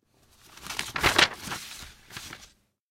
Turn over the book page 1
ambience, author, background, background-sound, book, cinematic, desk, dramatic, film, hollywood, horror, library, magazin, mood, movie, newspaper, office, page, paper, read, suspense, Turn-over-a-book-page